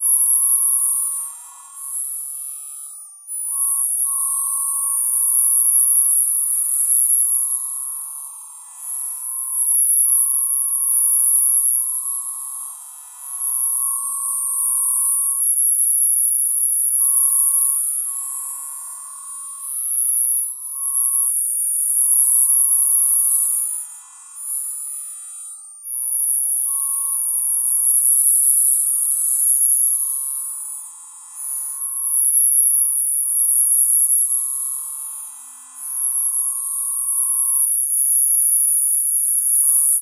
Created with coagula from original and manipulated bmp files. Turn the volume down, you have been warned.
chirp, cicada, image, insect, jungle, space, synth